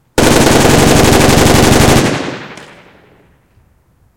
M16 burst in street
Sounds recorded by me for my previous indie film. Weapons are live and firing blanks from different locations as part of the movie making process. Various echoes and other sound qualities reflect where the shooter is compared to the sound recorder. Sounds with street echo are particularly useful in sound design of street shootouts with automatic weapons.
Weapon ID: Colt M16A1 - 5.56x45mm / 5.56mm NATO / .223 Remington